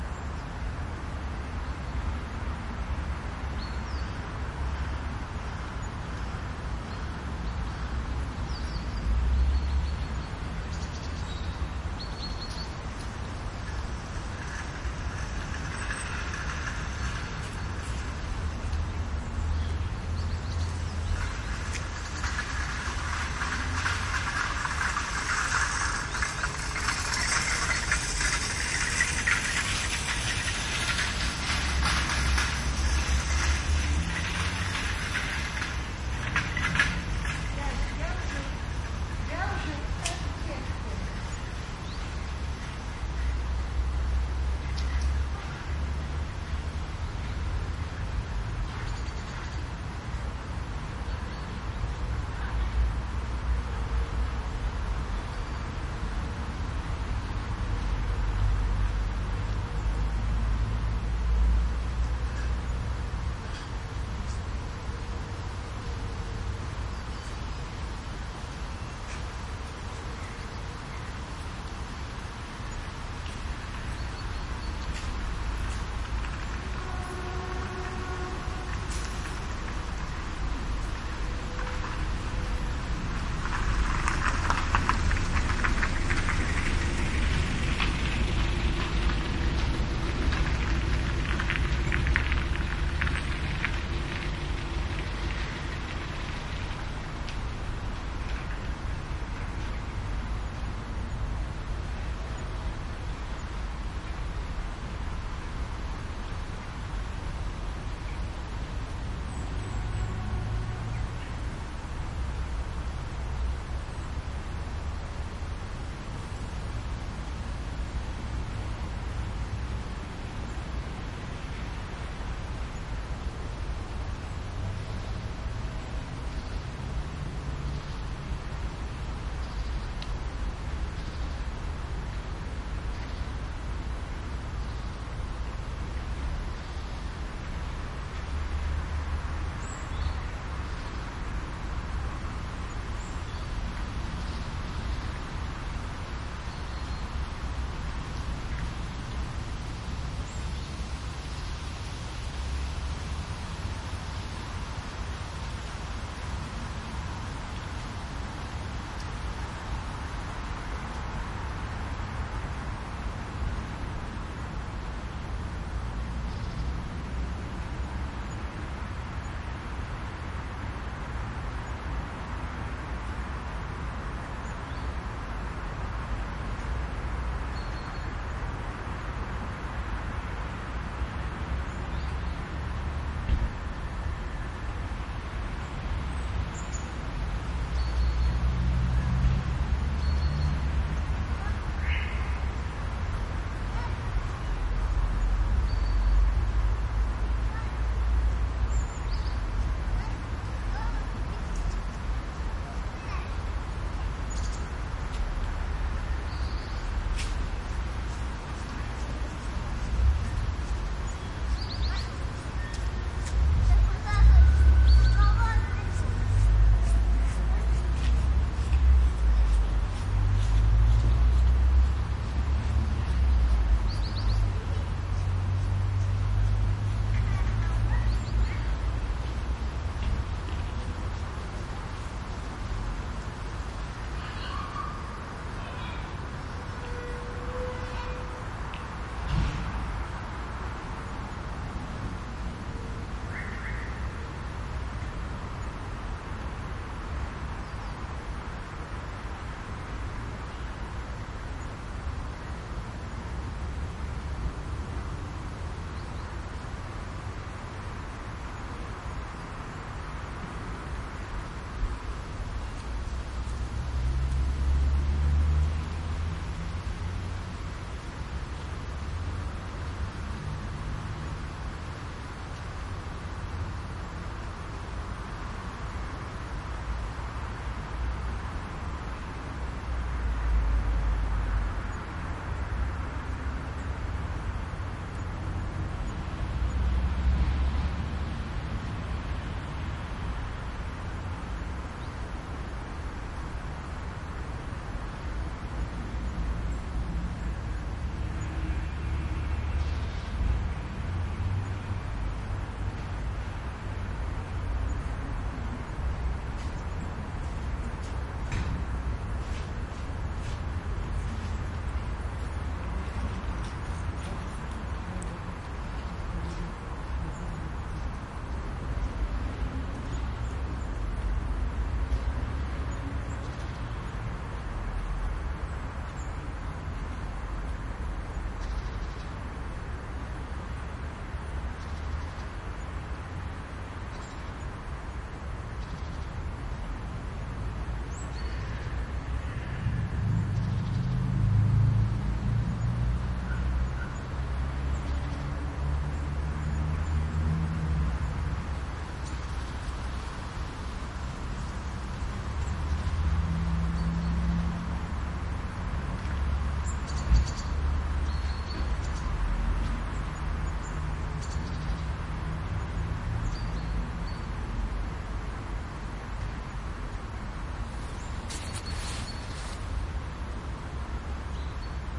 Autumn residential yard with some traffic